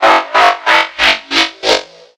Robotic Transformer Effect
A transformers-style robotic sound made with Toxic Biohazard in FL Studio 11, with Parametric EQ and Modulator 1.1 in the mixer, and a post production low pass using T-SLEDGE 149 in Wavosaur.
automation, biohazard, bot, control, cutoff, dub, dubstep, effect, effects, electronic, fl, flanger, flanging, fx, gate, machine, mod, modulation, modulator, phase, phasing, robot, robotic, special, studio, synth, synthesizer, toxic, transformer, transformers